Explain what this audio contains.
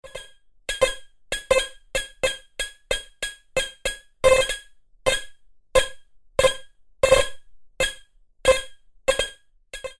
A series of irregular metallic blips. Made on an Alesis Micron.
alesis, blips, metallic, micron, synthesizer